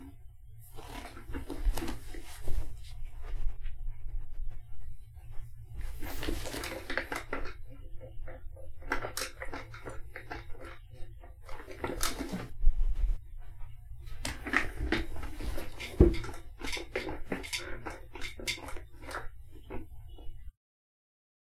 Sitting On Office Chair
Used in quite office space.
indoors, chair, human